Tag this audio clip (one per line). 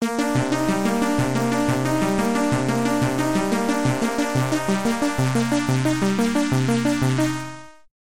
step-sequencer
step-sequencer-synthesizer
step-sequencer-vst